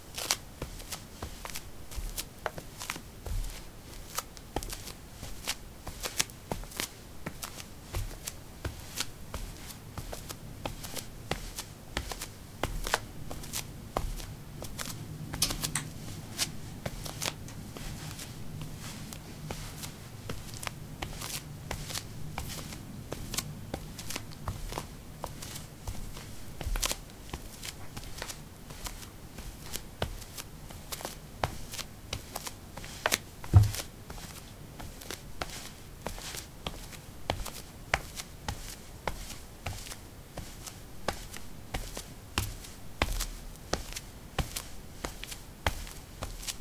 paving 02a socks walk
Walking on pavement tiles: socks. Recorded with a ZOOM H2 in a basement of a house: a wooden container filled with earth onto which three larger paving slabs were placed. Normalized with Audacity.
footstep, footsteps, step, steps, walk, walking